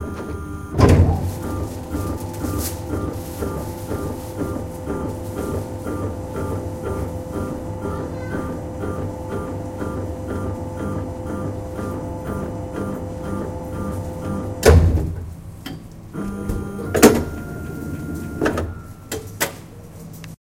this is a machine that slices bread into pieces. i find its texture very pleasing
bread, brot, cut, cutting, dubstep, field, machine, maschine, pulse, pulsierend, recording, satisfying, slice, slicing